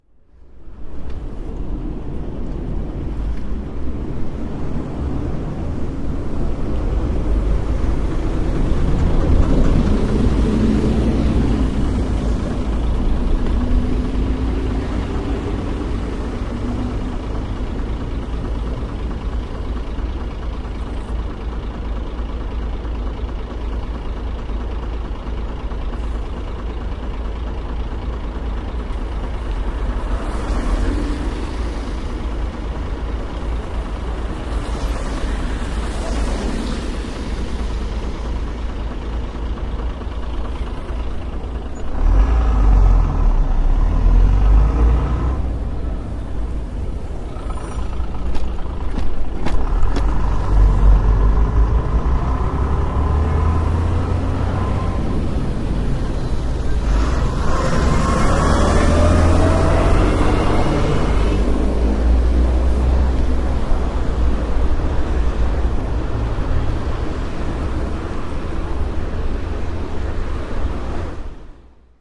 Waiting on my bike for a traffic light a truck stops next to me. When the light turns green I take off before the truck passes and drives away. Recorded with an Edirol R09 in the inside pocket of my jacket in October 2006.